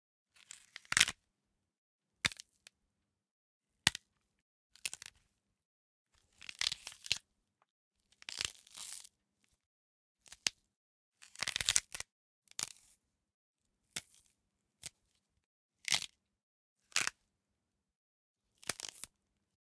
Just a broken celery. Please write in the comments where you used this sound. Thanks!